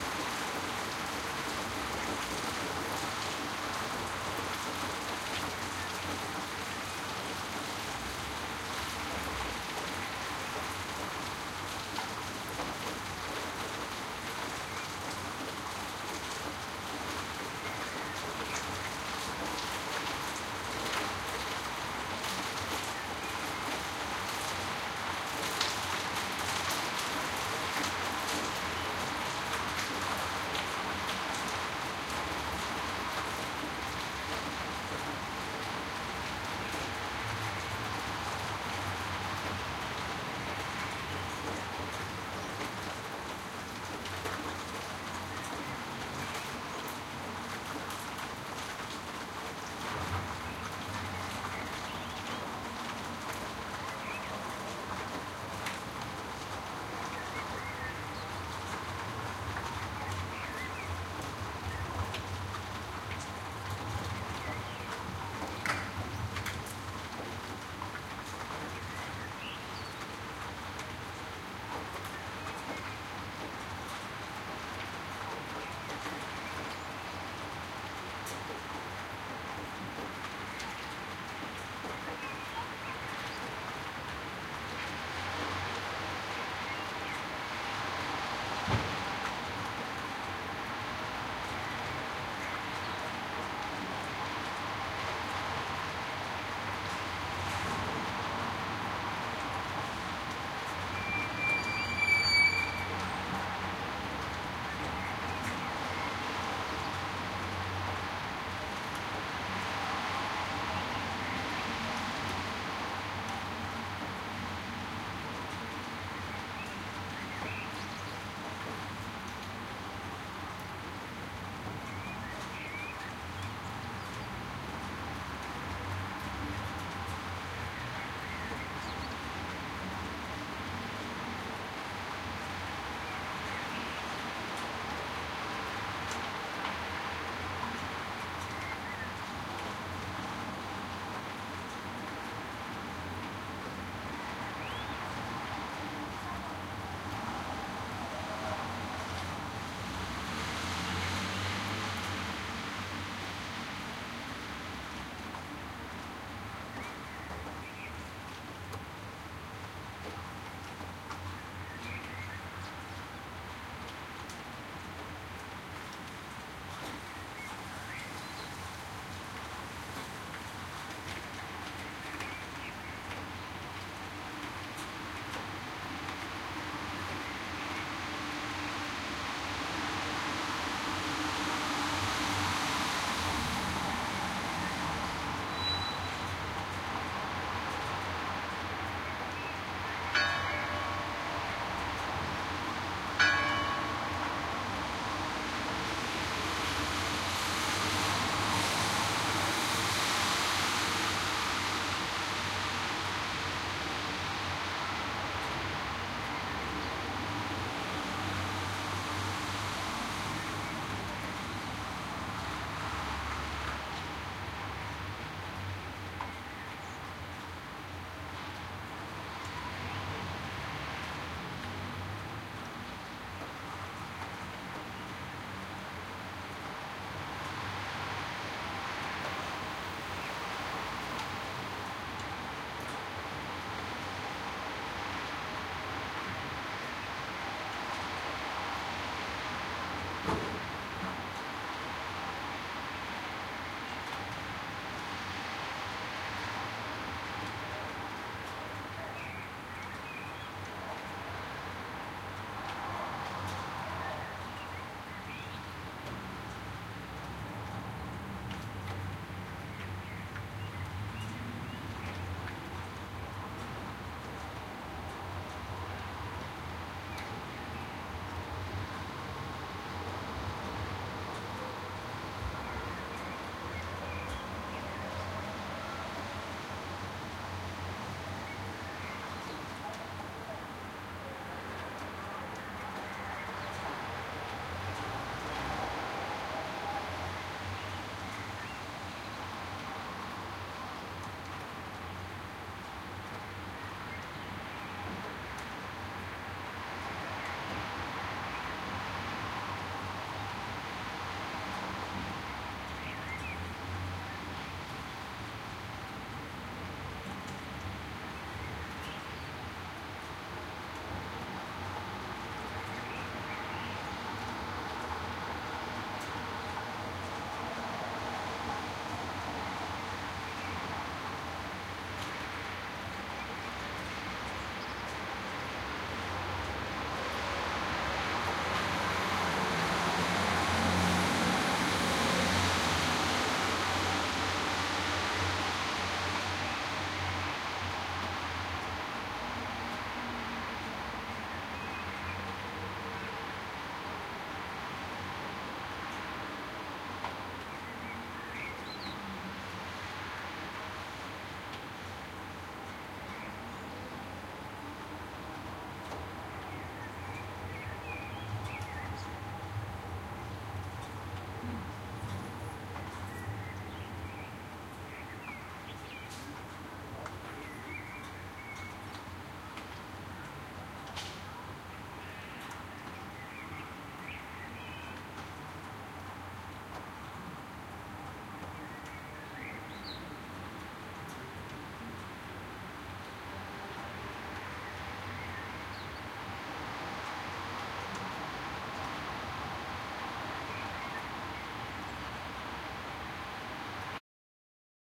soft rain decreasing
a bit of rain combined with typical city sounds. recorded from my apartment in the 4th floor
birds, rain, street, city